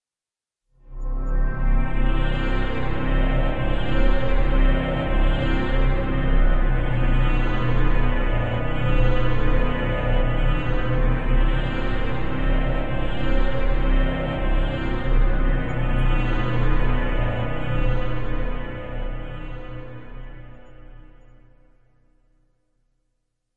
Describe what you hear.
sci-fi9
ambience, atmosphere, background, bridge, drone, emergency, energy, future, futuristic, fx, hover, impulsion, noise, pad, Room, rumble, sci-fi, spaceship, starship